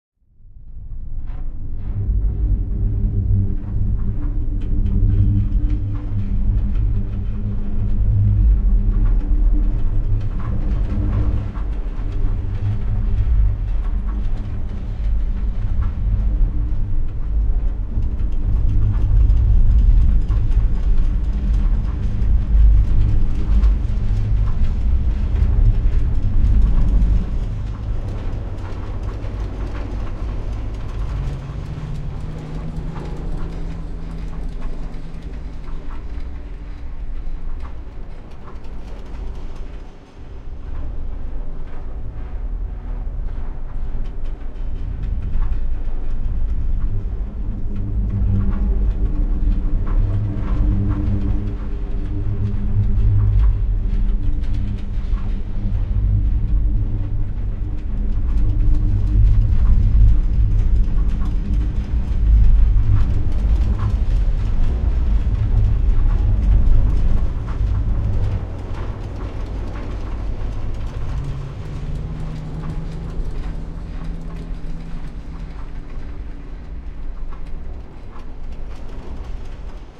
Factory Atmo
Factory Stea,punk Atmosphere
Cinematic, Travel, Buzz, Factory, Buzzing